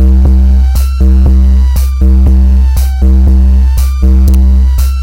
FLoWerS Viral Denial Loop 001
A few very awkward loops made with a VST called Thingumajig. Not sure if it's on kvr or not, I got it from a different site, I forgot what though, if you find it please link to it!
noise, loop, weird, strange, arrythmic, awkward